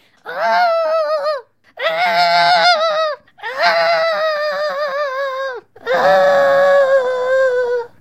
Old Woman Struggling 1
Old Woman Struggling